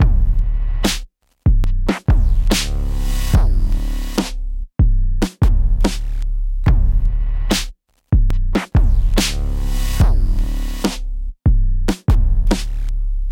squashed drums mgreel
Formatted for the Make Noise Morphagene.
This reel consists of a spliced drum loop. The final splice is the whole loop without any splices.
Super compressed drums from Elektron Octatrack.
compressed, drum-loop, mgreel, morphagene